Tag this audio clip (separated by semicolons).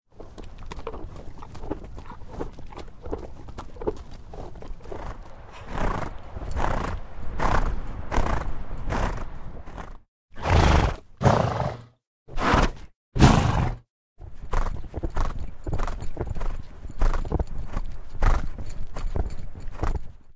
gallop; horse